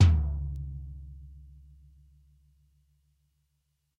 Dirty Tony's Tom 16'' 057
This is the Dirty Tony's Tom 16''. He recorded it at Johnny's studio, the only studio with a hole in the wall! It has been recorded with four mics, and this is the mix of all!
punk, pack, tonys, drumset, real, realistic, tom, dirty, drum, kit, raw, set, 16